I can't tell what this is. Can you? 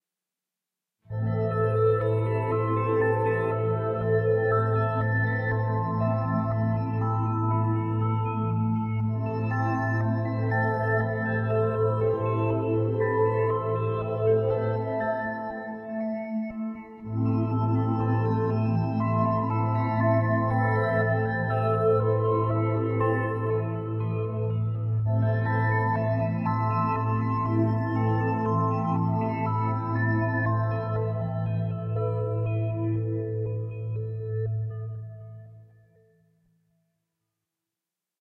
D51 Strobe mode Am
Another 'sound' from the GR-33 guitar synth...this sound was created on a guitar using the GR-33. Just fun stuff!!!
mode, stobe, roland, intro